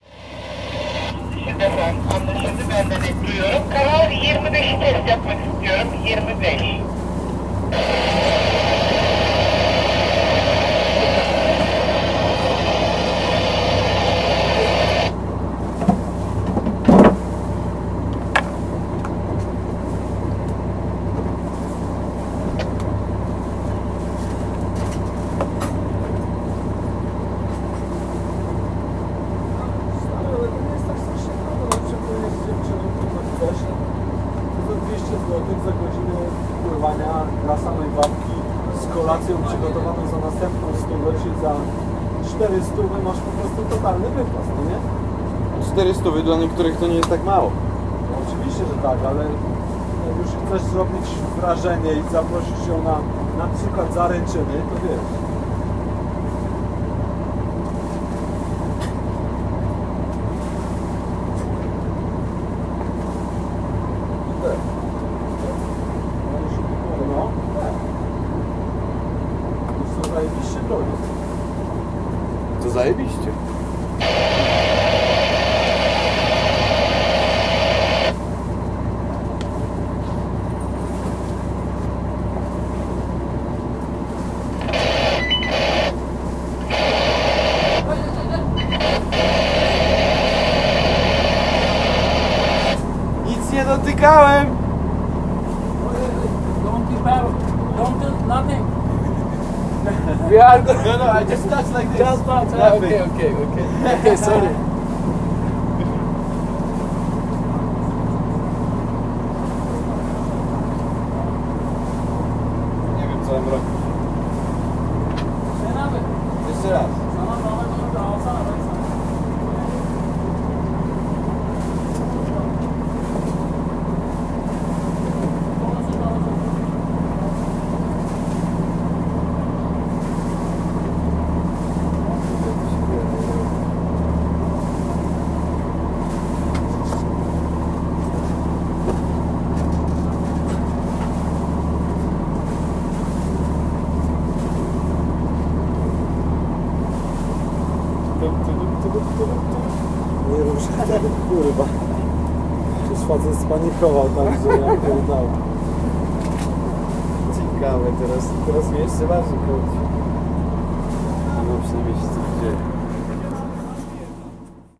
atmos boat
atmos and some conversations on a small boat trip in Antalya Turkey
atmos, boat, radio